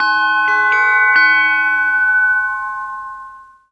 little bell
bell tones left out to dry
basic, bell, processed